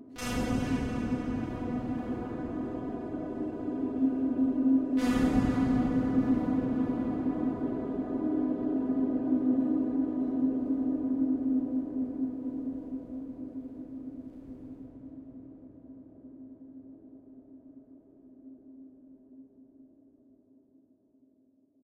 LAYERS 001 - Alien Artillery - B3
LAYERS 001 - Alien Artillery is an extensive multisample package containing 73 samples covering C0 till C6. The key name is included in the sample name. The sound of Alien Artillery is like an organic alien outer space soundscape. It was created using Kontakt 3 within Cubase.
artificial, space, drone, soundscape, pad, multisample